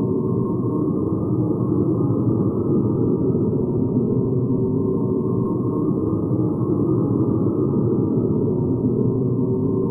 Deep Ambience
I suppose you could say this is a bit science-fictiony. Kinda like background noise if you were alone in an abandoned space station or starship with the sound of machines and whatnot around you.
And as an added bonus, it is a perfect loop.
Recorded with a Yescom Pro Studio Condenser Microphone using my own voice.
Used Audacity for editing.
- A Proud Australian
deep, sci-fi, ambience, dark, atmosphere